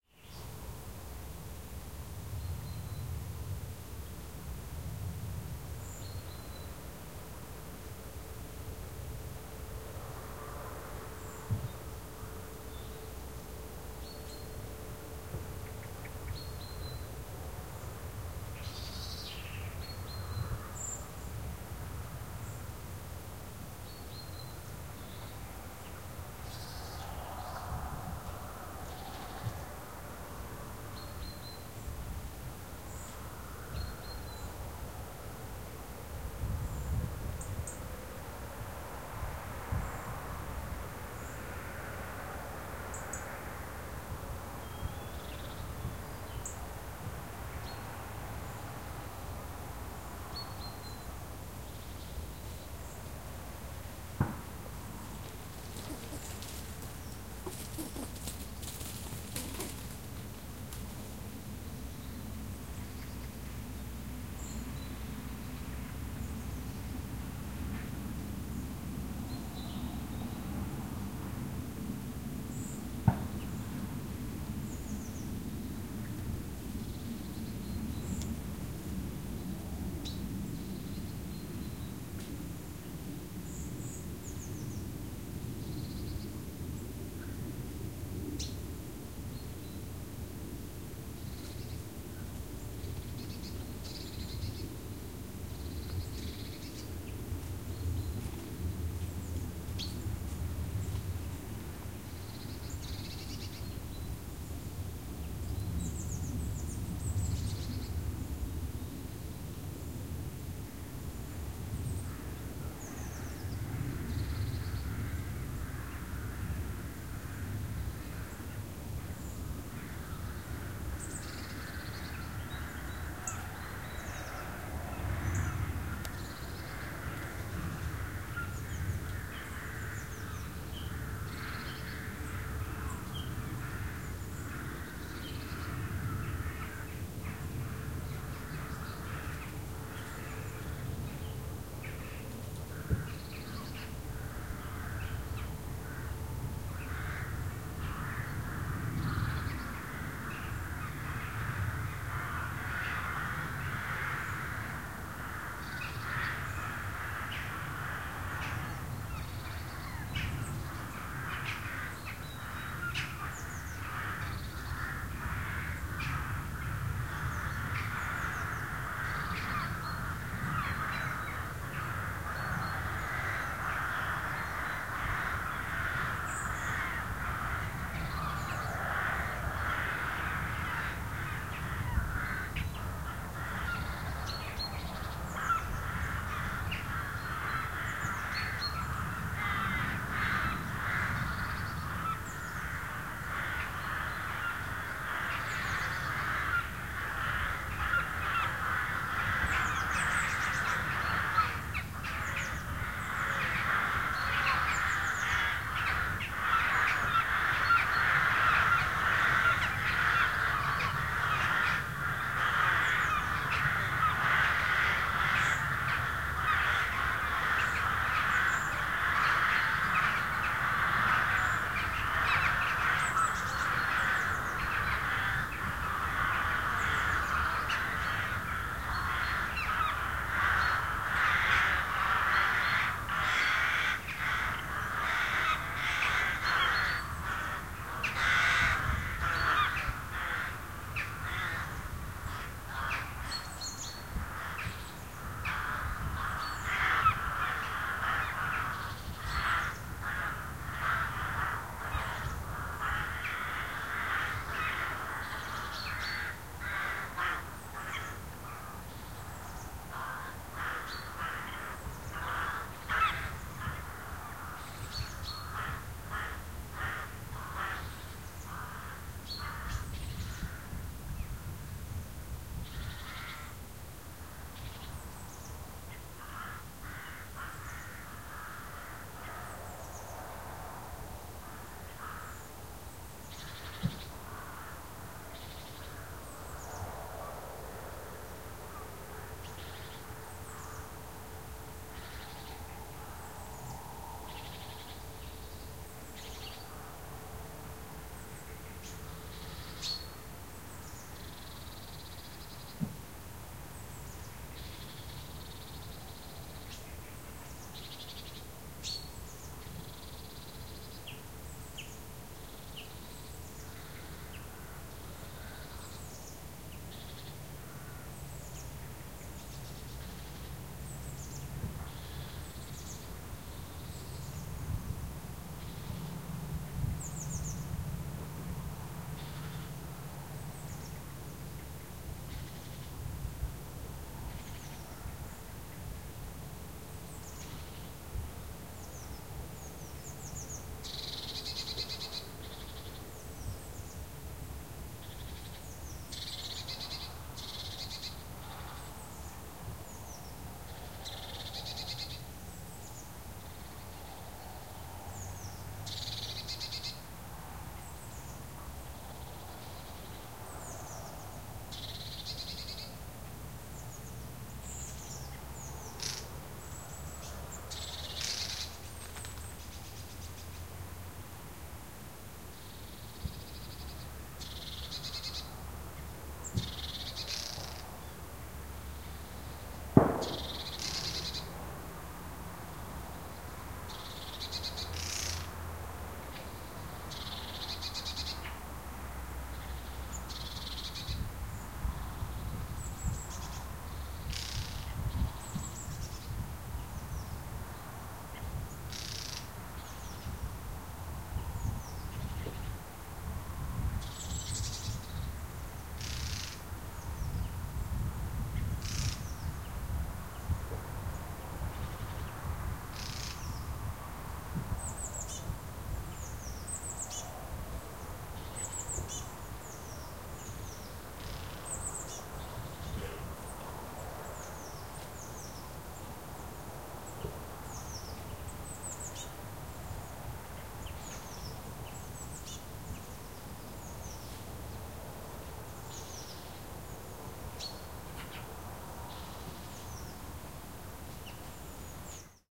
countyside copse ambience
Recorded on a zoom q3 in a small copse near home. lots going on here, birdsong, rooks, road noise and a squirell i think at one point!
ambience, countryside, Field-Recording, woodland